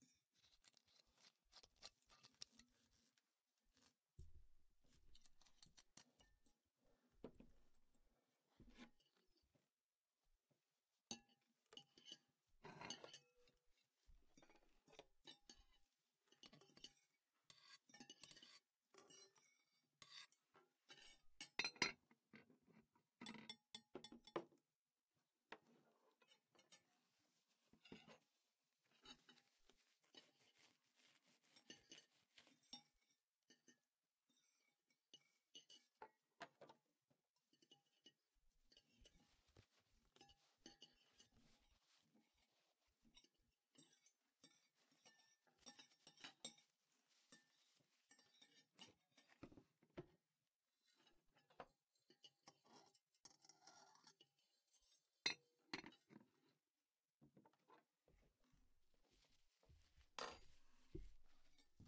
Just people having dinner